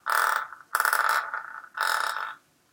45 2 Madera crujiendo
Crunch, Sound, Wood